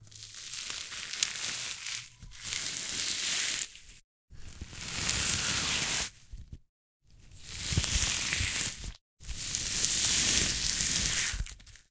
Ice Jel Pillow Smashing
frozen, ice